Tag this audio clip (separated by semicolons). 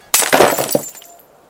crash; smash; pottery; glasses; shards; splintering; breaking; break; glass; shatter; crack